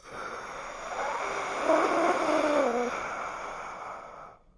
Monster breathing 2
horror, breathing, Monster, disturbing